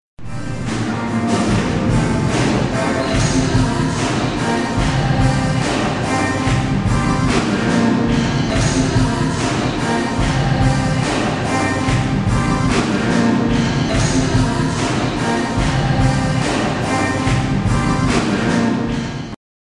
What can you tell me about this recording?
13.06. 2010: about 16.00. The catholic-rock concert in the basement church on Osiedle Polan in Poznan. The Nawiedzenia Najswietszej Maryi Panny church: amazing building (enormous, it looks like a stadium).
catholic, church, concert, field-recording, poland, poznan, religion, singing